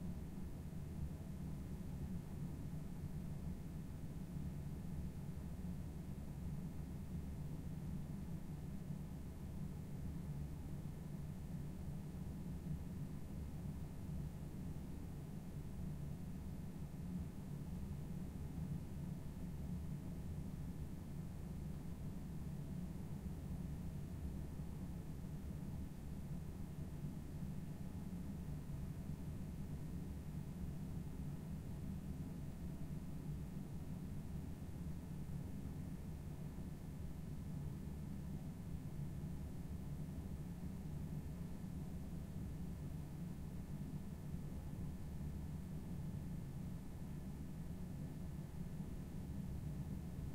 QUIET CLASSROOM ROOMTONE 02
Quiet roomtone of a classroom recorded with a Tascam DR-40
classroom, roomtone